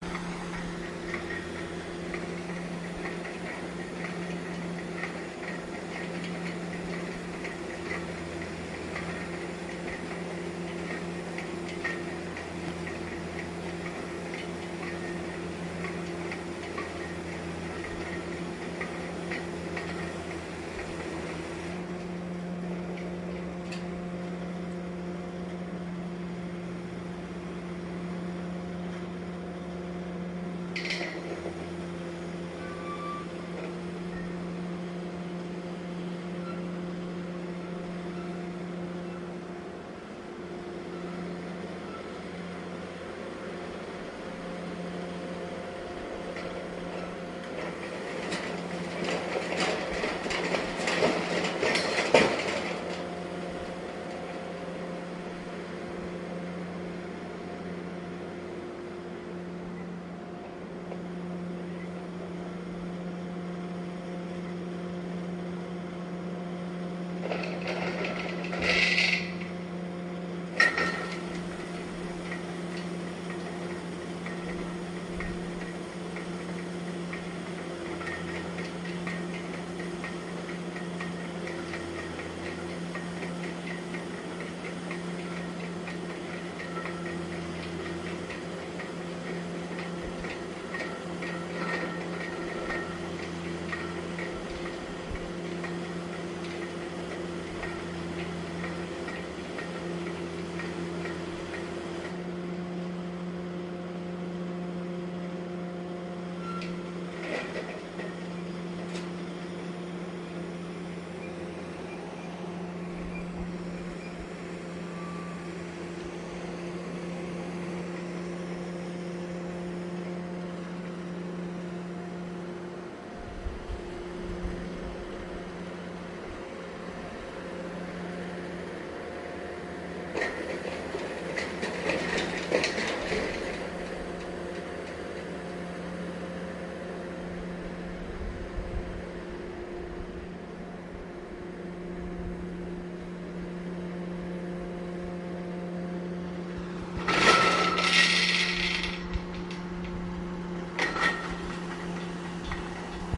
Construction part 1
This is the ambiance of a construction sight.